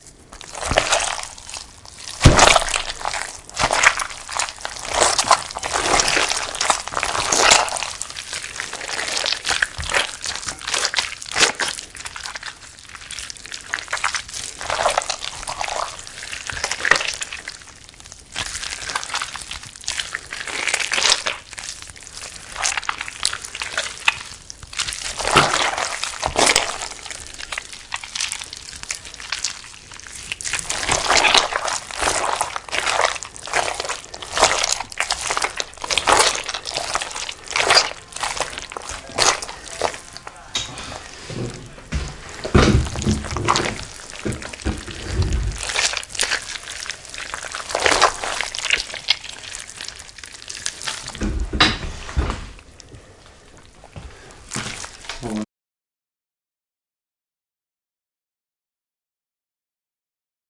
Pumpmkin Guts Long
Pumpkin Guts Squish
guts, squish